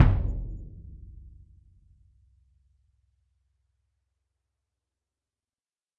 Ludwig 40'' x 18'' suspended concert bass drum, recorded via overhead mics in multiple velocities.